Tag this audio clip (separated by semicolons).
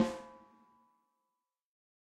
technica; combo; samples